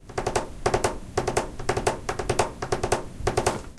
You can hear as a person knocks on the table with its nails. It has been recorded in a recording classroom at Pompeu Fabra University.
nervous, UPF-CS14